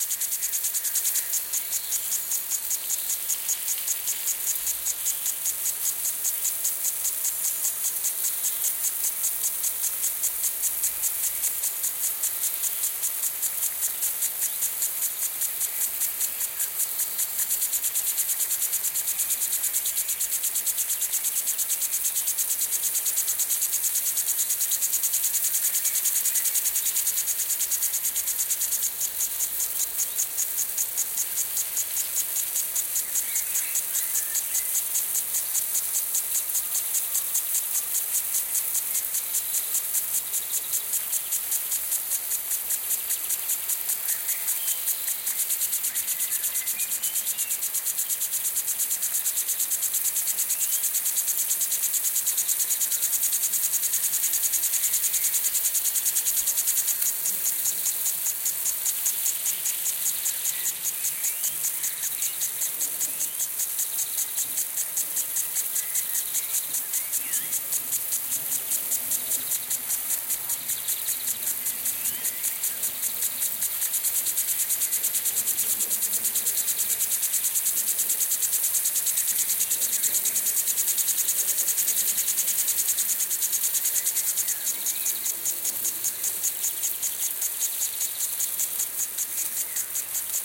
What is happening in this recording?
The recording was done with the Soundman OKM II and a Sharp minidisk recorder MD-DR 470H.
A very refreshing sound on a hot day, this sprinkler in a small garden.
It was operated with ground-water, so no waste of expensive tap water.